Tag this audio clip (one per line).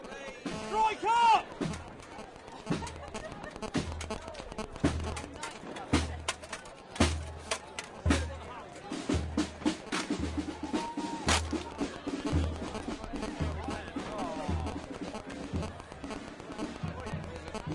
bangs
lewes
march
noisy
people